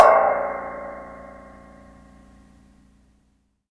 Impulse responses made with a cheap spring powered reverb microphone and a cap gun, hand claps, balloon pops, underwater recordings, soda cans, and various other sources.
convolution, impulse, response, reverb, spring